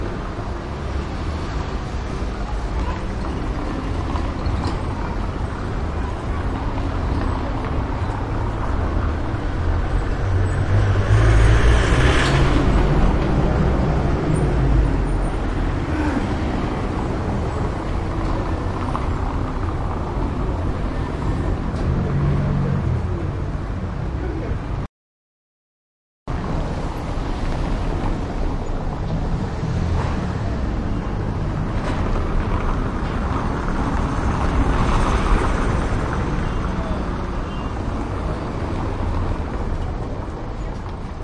traffic light trucks cobblestone street 2 clips Cusco, Peru, South America